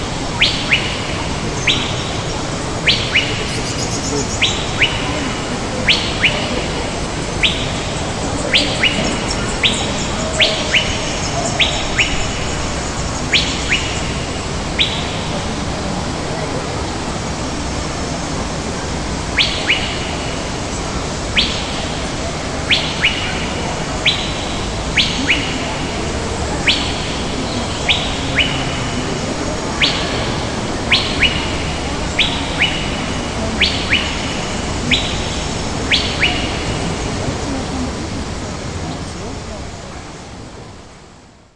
Godwanaland amtosphere
Its a dome where a tropical rainforest is emulated. Really great climate there - warm and moist. There are some wooden structures to climb up in the trees. There I recorded this little atmosphere. Unfortunately there are also some human voices on the record but well - It's also a part of the atmosphere.
Best wishes
pillo